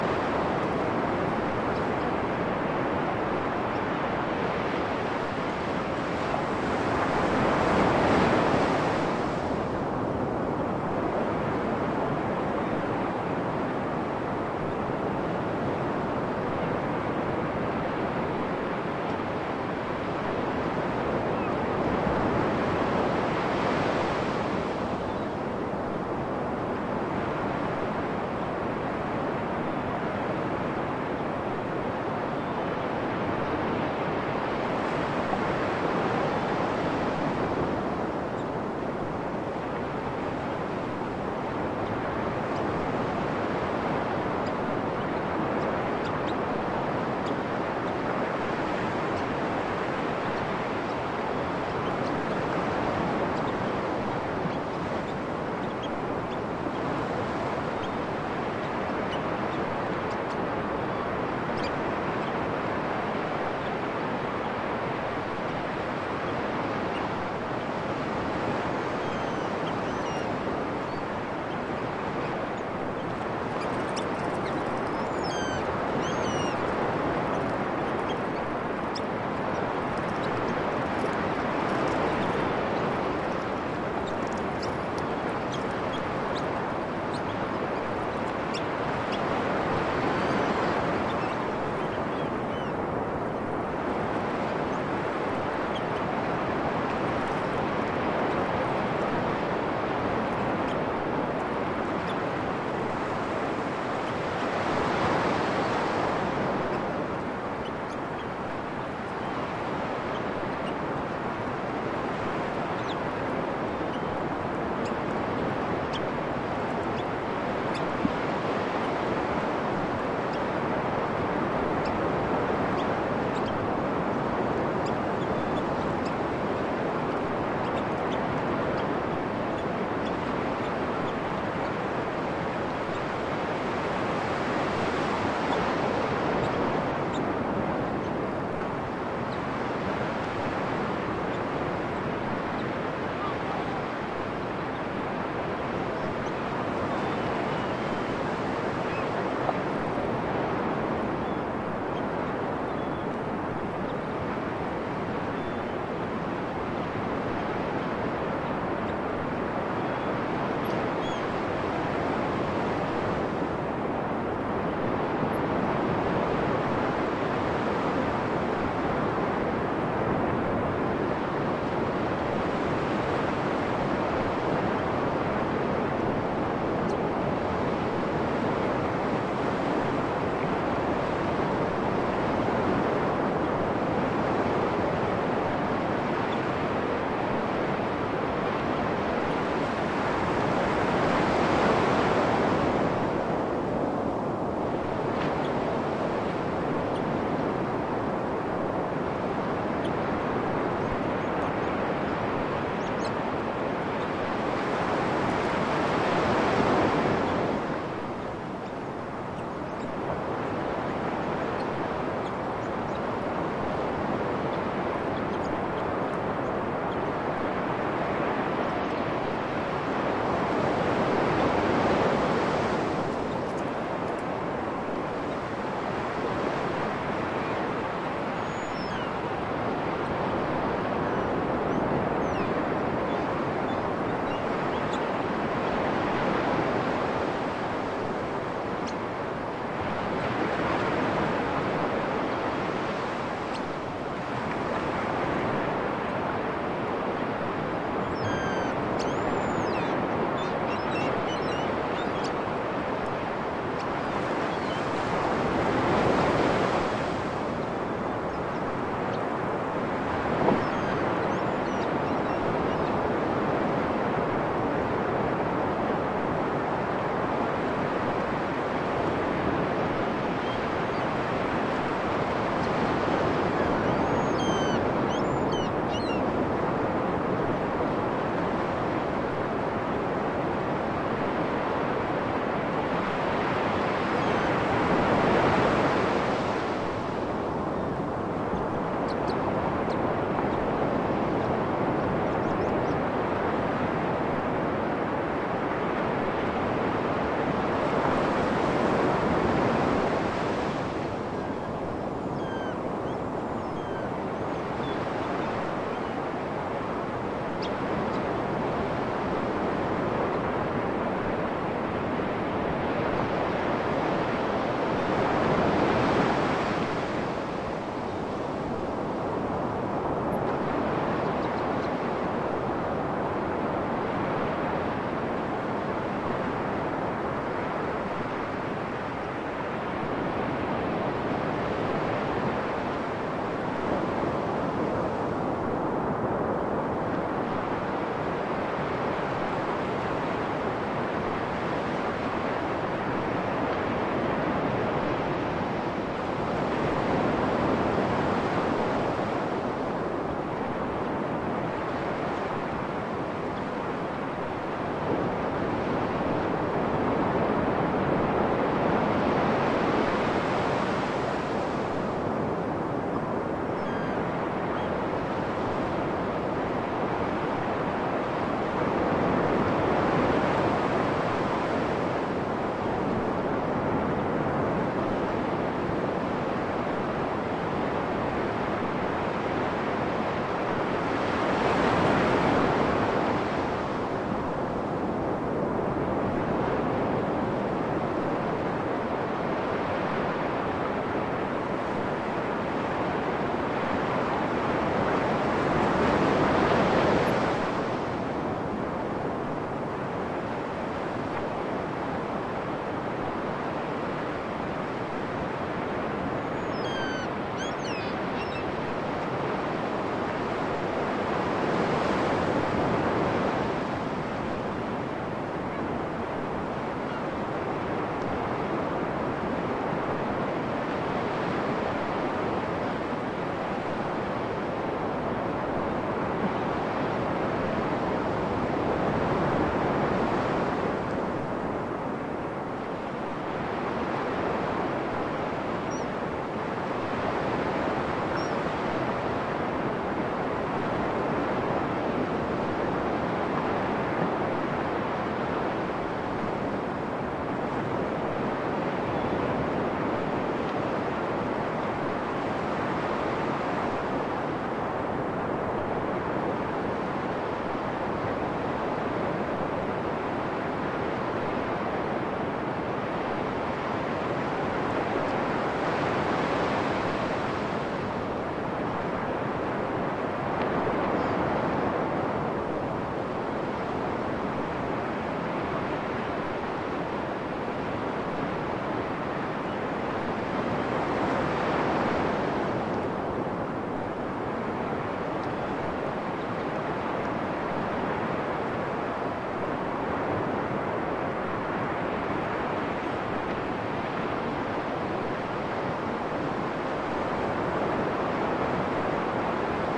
Henne beach waves and seagulls 2013-02-09
I went for a walk at one of the local beaches and decided to record a little. There was almost no wind at all, but some waves still. After walking for about half an hour, i found a perfect spot, right beside a lot of seagulls and their nestlings.
Slowly and quietly i placed my recorder, without scaring the birds away. And after walking away, i could see the birds running around the mic. Around two minutes (plus minus) into the recording, you can actually hear the small and quick steps all around.
The rest is all about waves, and hearing the big seagulls. Enjoy!
Recorded with a zoom h2.
gulls, sea, Jutland, cold, birds, wave, nestling, seagull, northsea, coast, Denmark, beach, Henne, seagulls, zoom-h2, field-recording, recorder, west-coast, water, ocean, frost, nestlings, waves, sand, winter, north-sea, west, steps